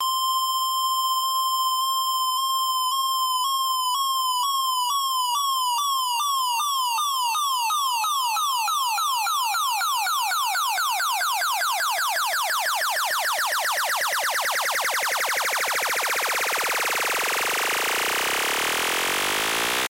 Simple Wobbles which get faster and higher, made with Massive in Fl Studio
BPM: 145
Wobble, Dubstep, Rise, Elevator, Upfilter, Up, Studio, Massive, Fl, Psytrance